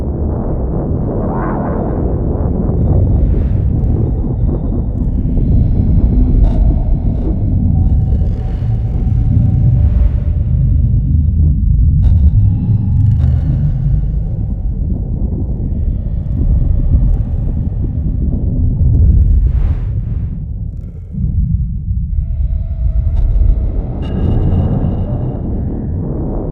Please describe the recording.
Ambience Hell 01
A dark and hellish ambience loop sound to be used in horror games. Useful for evil areas where sinister rituals and sacrifices are being made.
ambience, sfx, video-game